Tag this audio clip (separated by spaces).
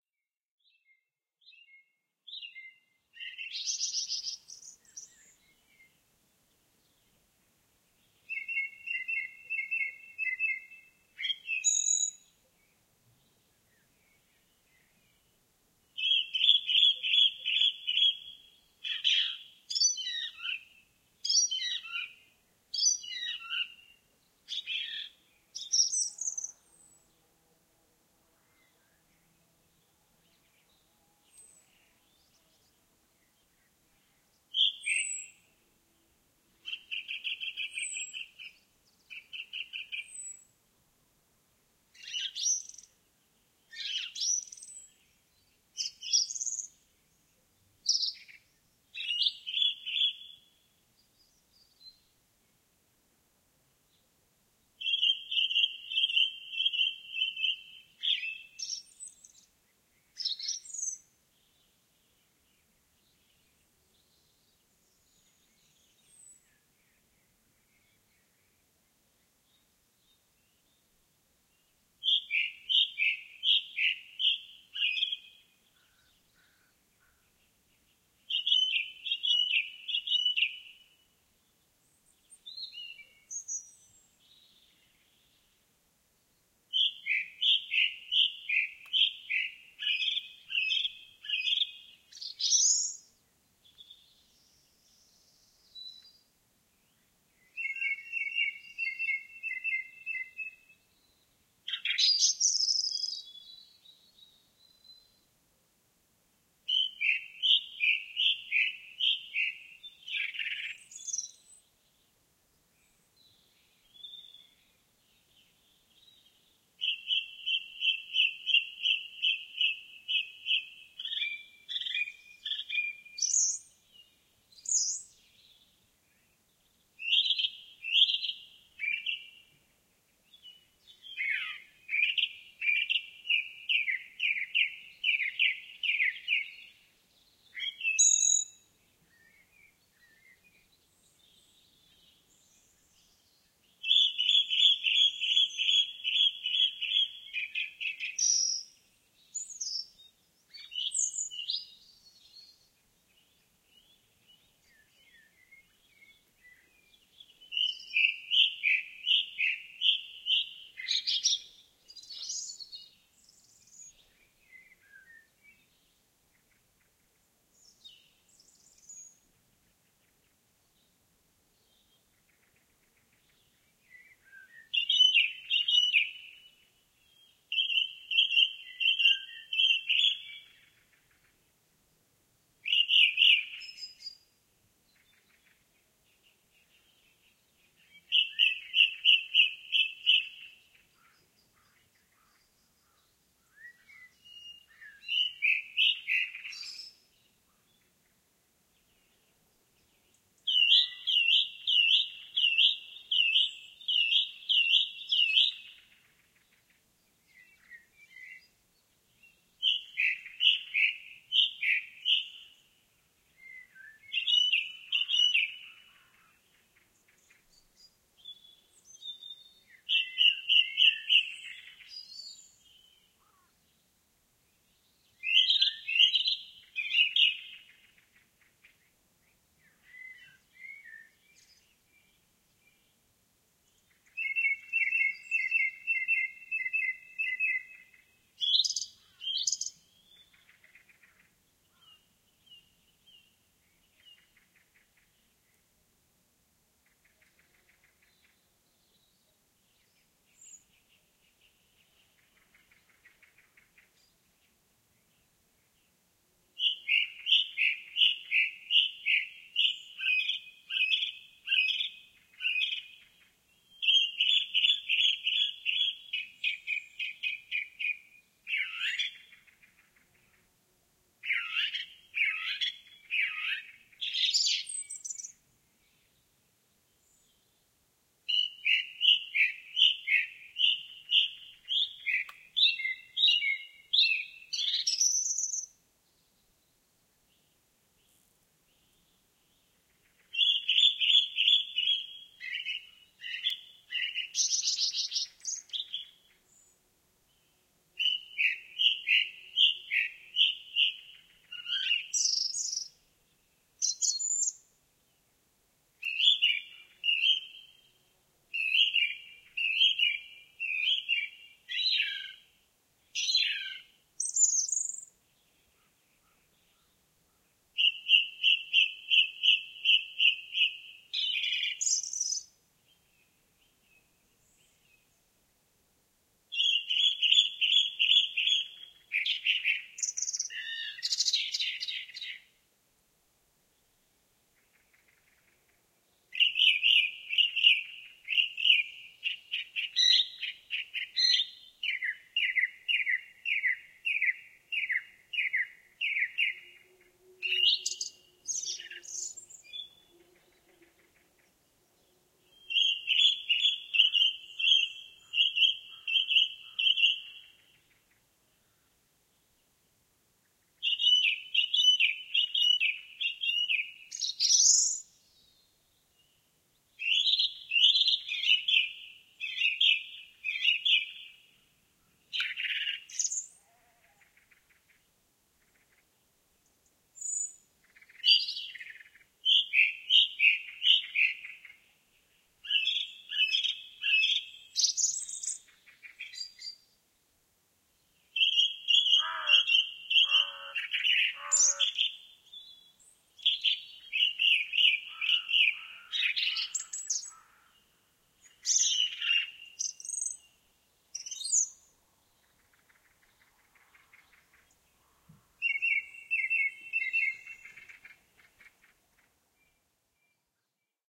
bird birds field-recording singing song song-thrush turdus-philomelos